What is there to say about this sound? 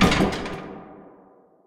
Used as a sound effect for trying to open a bolted down ventilation shaft.
shake, slam, impact, metal, rustle, locked, duct, reverb